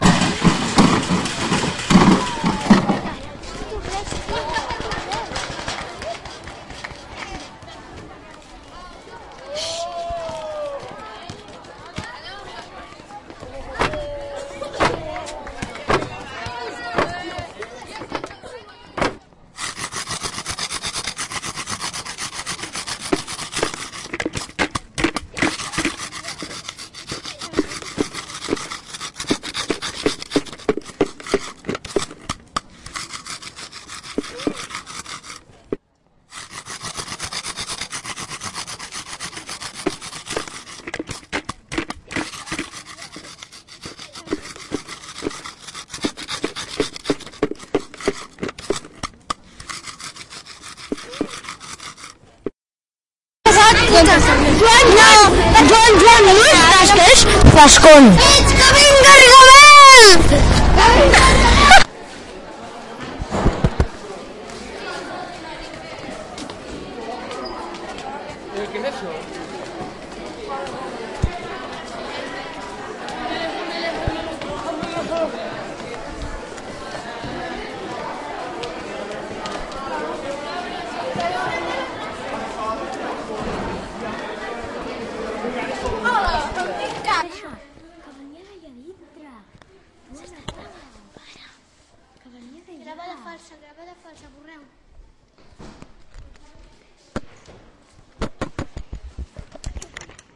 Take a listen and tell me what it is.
SonicPostcard SASP PolJoanEulalia
5th-grade, sonicpostcard, spain, cityrings, santa-anna